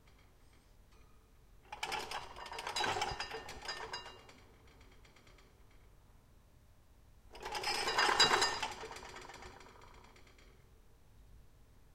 FXLM cups dishes kitchen far shaking rattling tinkling earthquake vari XY
Cups and dishes in the kitchen rattling and shaking like during an earthquake. Recorded from far away with Zoom H6 XY stereo mics.
Variation from further away also available.
collapsing, cups, dishes, earthquake, far, foley, kitchen, rattle, shaking, tinkling, vari, XY